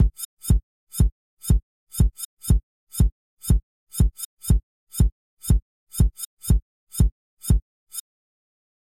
Sound edited and located to generate syncopas